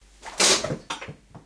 Actually, I did this by accident when a piece of metal hit the wall.